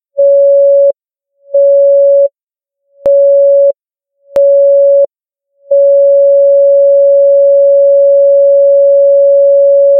BELLEUDY CosimA 2020 2021 Phone
This sound is a synthetic sound created with a 560 tone.
For this sound I modulated the tone to create the ringing effect (very fragmented sounds) and added a reverb effect to it.
mobile, phone, synthetic